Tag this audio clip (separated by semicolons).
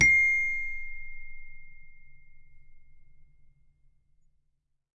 celeste; samples